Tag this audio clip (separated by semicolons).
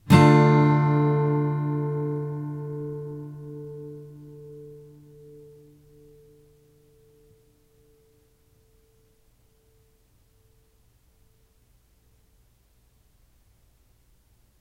guitar; strummed; acoustic; chord